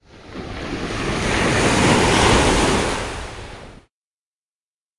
sample of a wave crashing a side of a ship or rocks